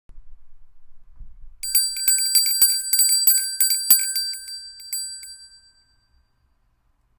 Bell, ringing, ring